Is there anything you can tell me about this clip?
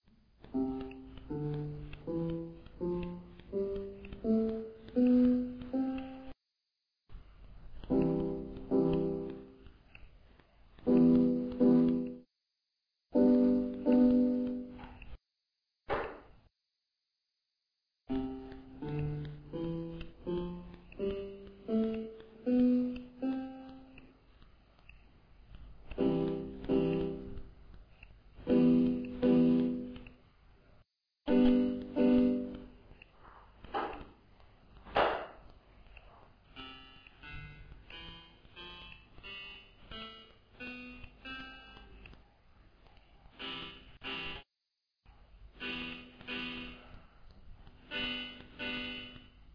proba hangok
Demonstration sound test of an old Crumar Compactpiano synthesizer. Recorded with a very noisy mp3 player and voice recorder. Some automatic and manual noise reduction were applied with audacity.
Compactpiano, old-synthesizer, Crumar